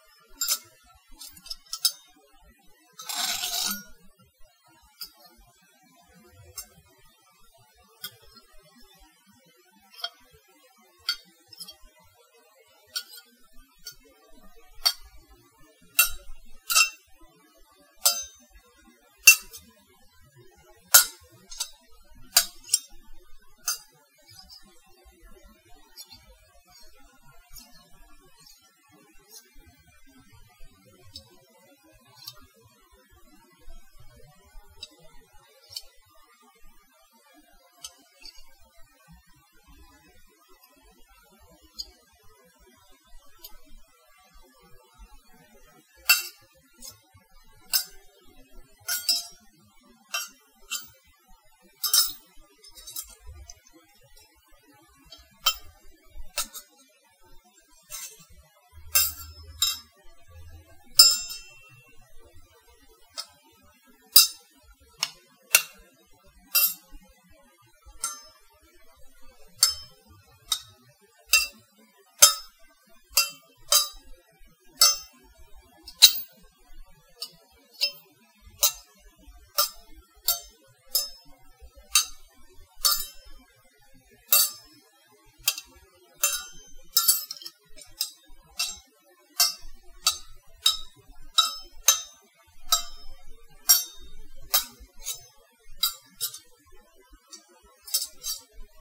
knife-sword sounds
two butter knives clinking together
clink, knife, metal, sword